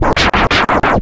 The right mouse button trick was only slightly successfull so processing was in order to achieve the different scratch sounds. This is the infamous chirp, or my attempt to recreate it anyway.
dj, scratch, scratching